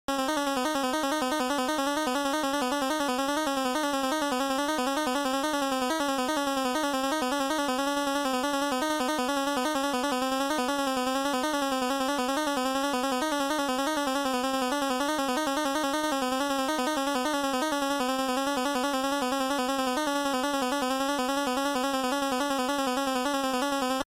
Wavering Text Scroll G3 6 165
A sound made in Famitracker that could be used during scrolling text to portray a character talking. The notes are based around G of the 3rd octave.
8-bit, game, old, play, read, scroll, text, video, wavering